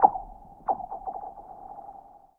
this sample is part of the icefield-library. i used a pair of soundman okm2 mics as contact microphones which i fixed to the surface of a frozen lake, then recorded the sounds made by throwing or skimming several stones and pebbles across the ice. wonderful effects can also be achieved by filtering or timestretching the files.